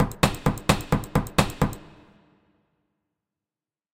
Run loop
Drums, Electronic, Sampling